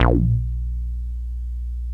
progressive psytrance goa psytrance
psytrance, goa, progressive